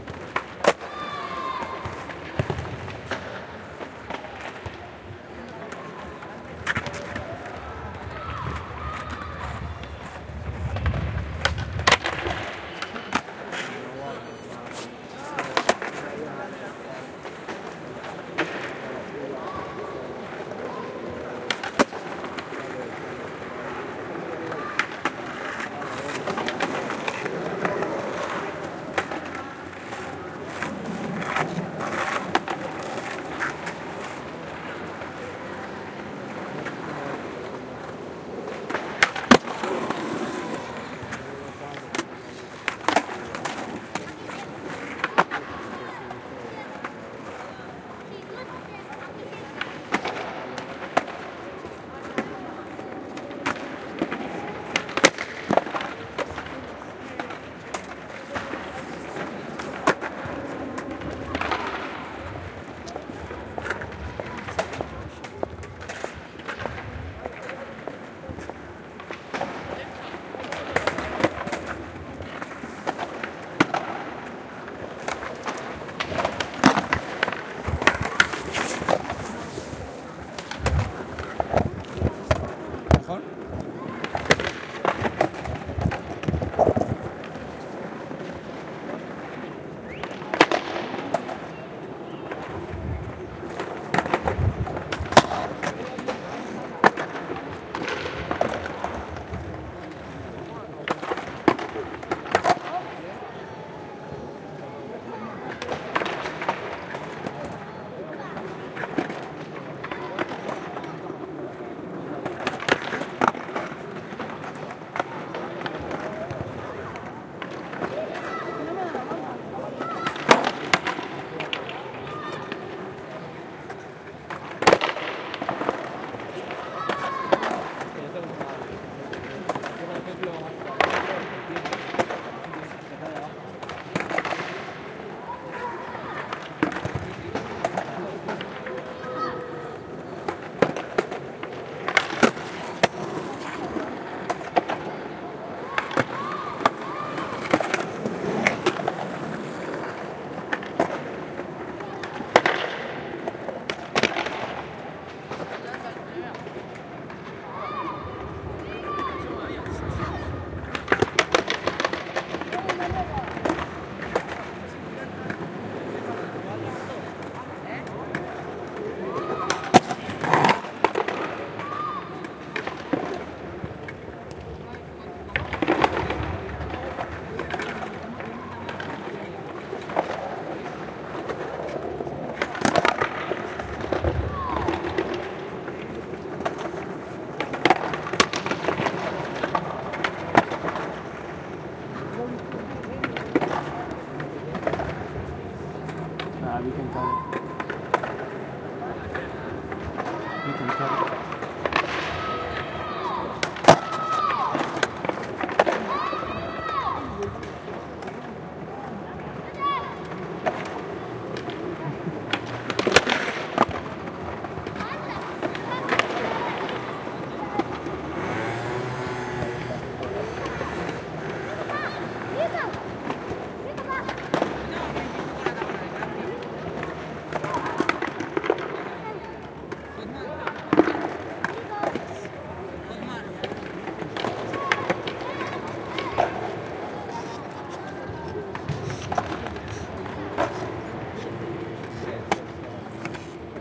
Right next to the MACBA museum in barcelona
there is a square frequented by skateboarders. This was recorded by
putting the microphone on the floor direction skaters. You can hear
nice stereo effects as the skateboard rolls past. There was some whind, so some nasty sideeffects.